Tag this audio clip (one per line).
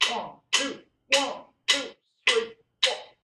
Sample
Release
Stiks
Record
Livedrums